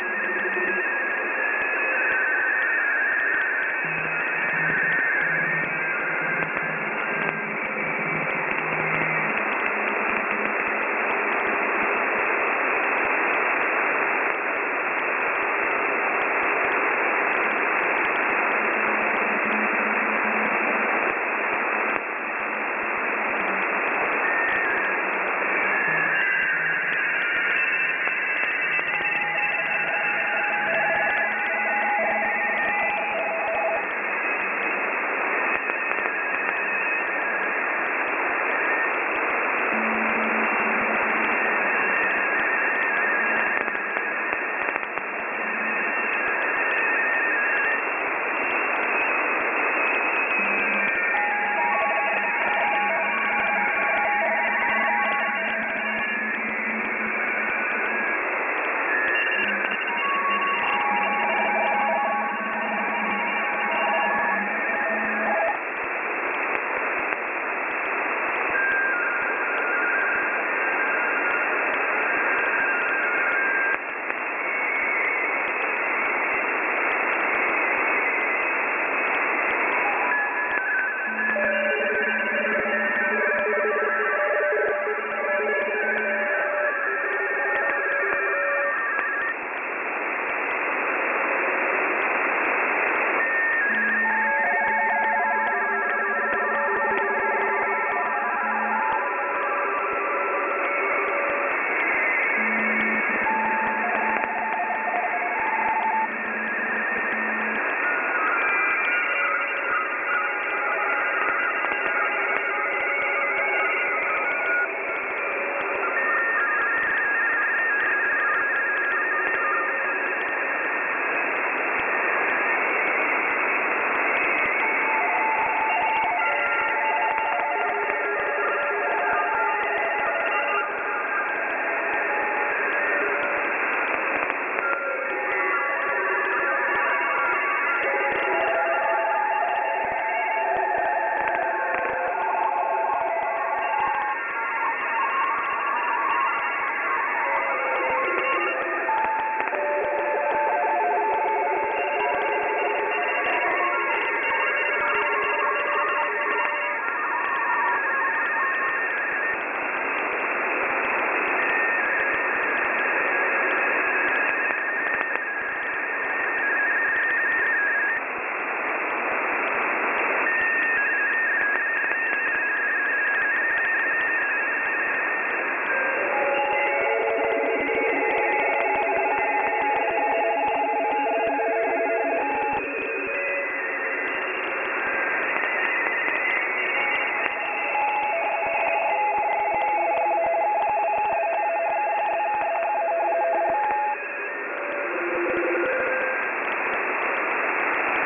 Ham radio morse code broadcasts on shortwave radio. The file name tells you the band I recorded it in. Picked up and recorded with Twente university's online radio receiver.
am, amateur, amateur-radio, beep, code, ham, ham-radio, morse, morse-code, radio, shortwave